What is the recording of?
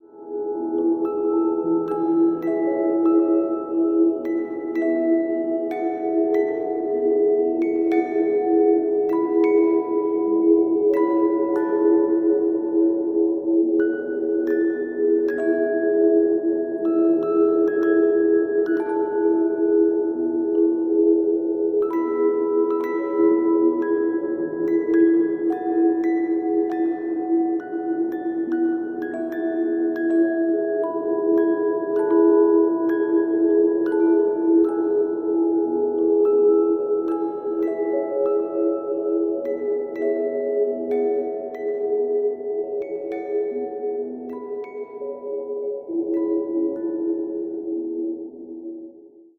Sweet thang (instrumental edit)
Two detuned music boxes played in stereo against an vocoder soundscape.